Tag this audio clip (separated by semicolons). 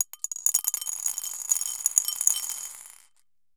bowl; ceramic; ceramic-bowl; dish; drop; dropped; dropping; glass; glass-marble; marble; marbles